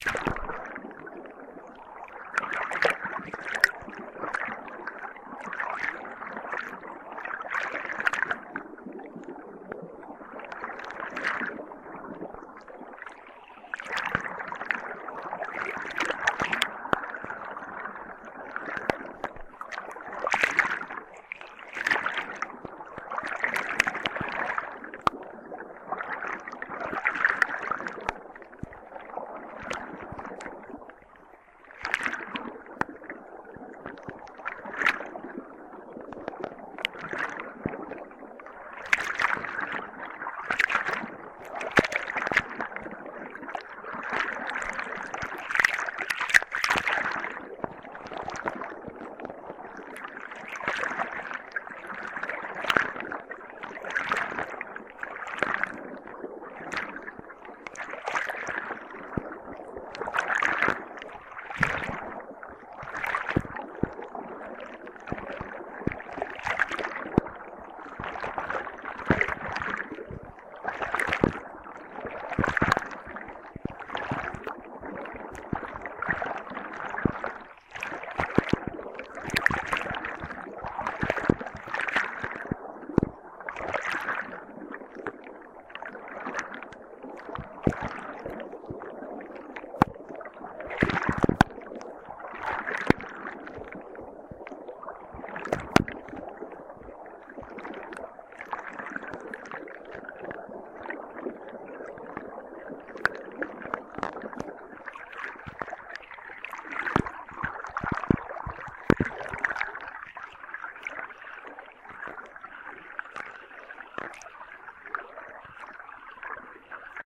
Underwater (small river)
This is a first attempt to record sound underwater, I build a small hydrophone according to the instruction gave us by digifishmusic, I could probably make it better but all considered I'm pleased whit it. The Hydrophone was placed in a small river near where I live, just below the water surface but unfortunately it kept bouncing against the rocks so it's a bit noisy. Enjoy!
bubbling, hydrophone, river, stream, underwater, water